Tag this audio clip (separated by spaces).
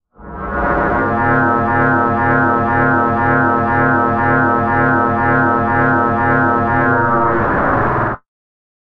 energy-field magnetic magnetic-field scifi synth vintage